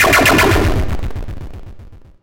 This sample was slowed multiple times, but it has a feeling the something was completely blown to smithereens.
8, retro, sample